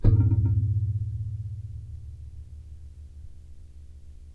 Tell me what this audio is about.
"Plucking" a Kitchen Aid wire whisk/whipper attachment with my finger. Recorded with a contact mic taped to the base.
bass, contact, finger, pluck, vibrate, whisk, wire